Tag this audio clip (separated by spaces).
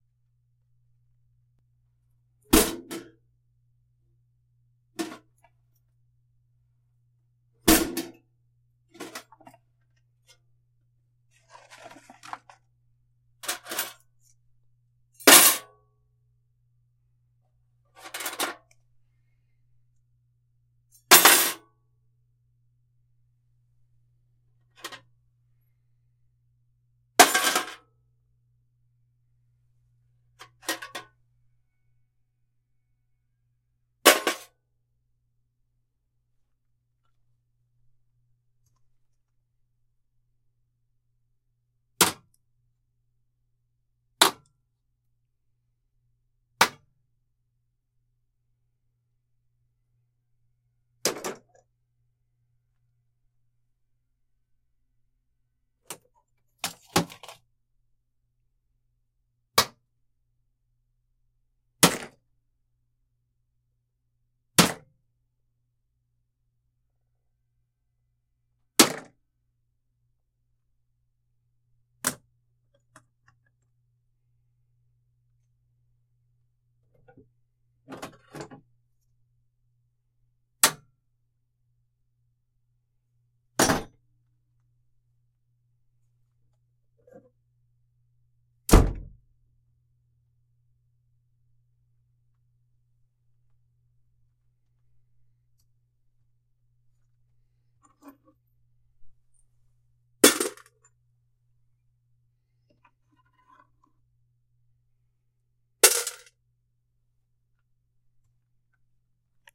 clang clank clanking clink iron metal metallic ting